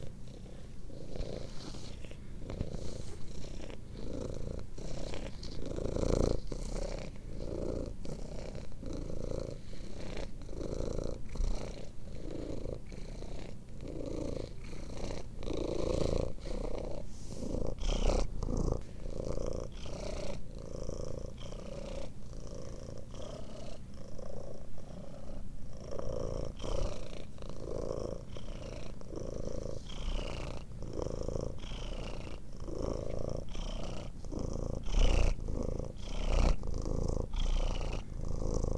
Mono recording of a cat purring.
cat, field-recording, purr, purring